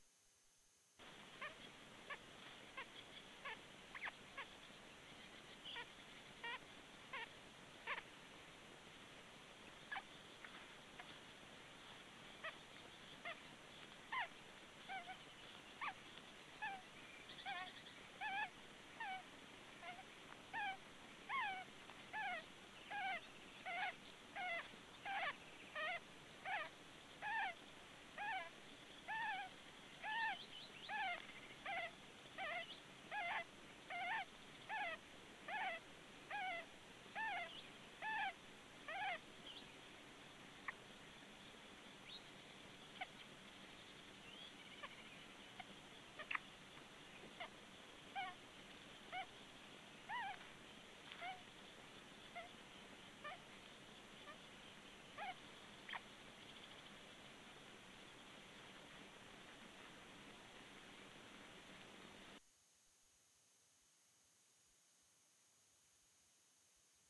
Sound from a livestream video of an Osprey nest with three chicks at night. The sounds must be one of them.
chick, nature, night, osprey